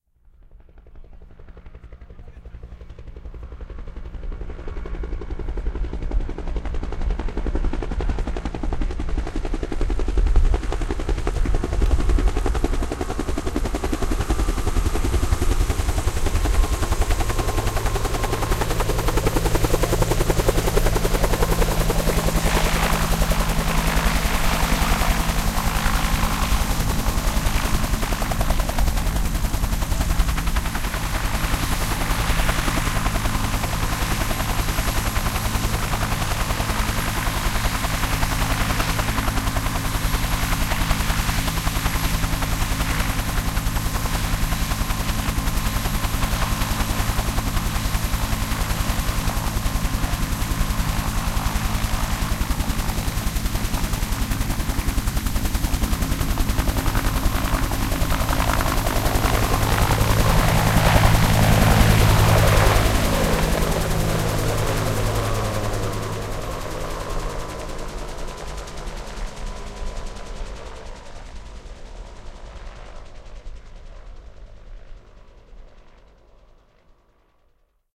Three recordings of a UH-1 Helicopter landing, loading passengers and then taking-off. Each of the recordings is slightly unique based on where it landed and wind conditions.
ambient, helicopter, huey, landing, take-off